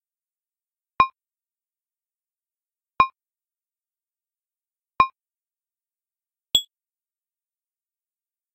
CountDown Beep
beep, Countdown, start